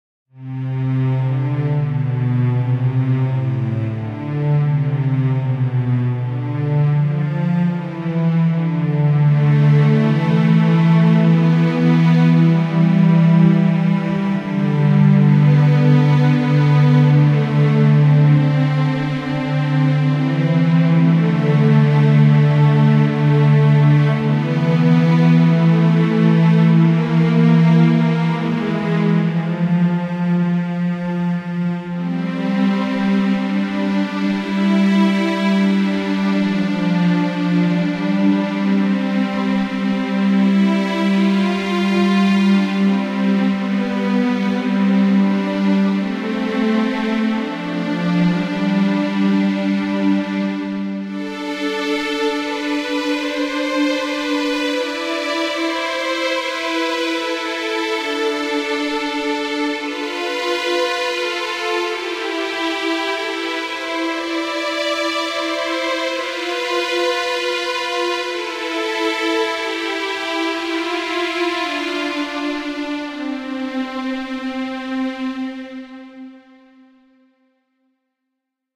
Slow Dramatic Orchestra Music
Drama, Music, slow